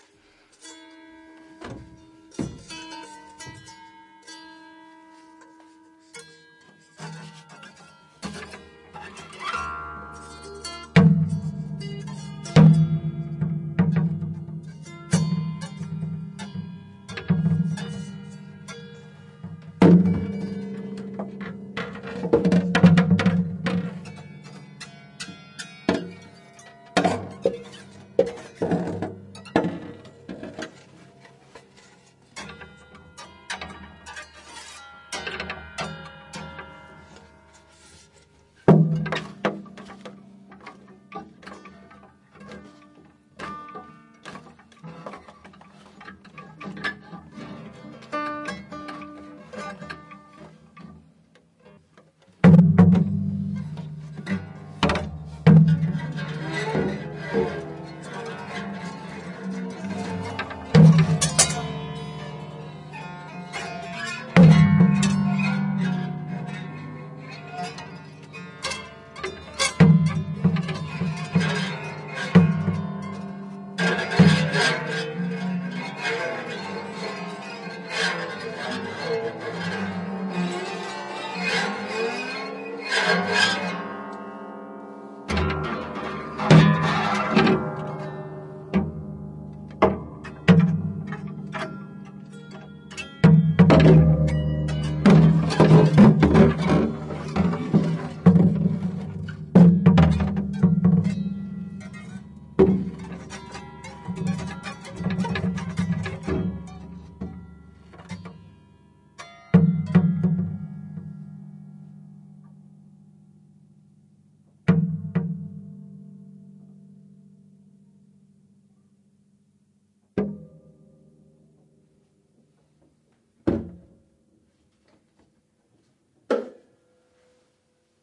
20091122.guitar.closet.02
noises - more or less random - made by two guitars that hang from a bar inside my closet. Sennheiser MKH60 + MKH30 into Shrure FP24, to Edirol R09. Decode to M/S stereo with free Voxengo plugin
random, scary, string, guitar, cabinet, noise, bang